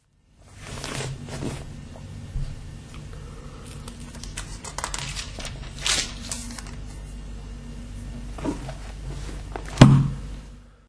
Turning the pages of the book Joel in the bible (dutch translation) the church has given my father in 1942. A few years later my father lost his religion. I haven't found it yet.
paper, turning-pages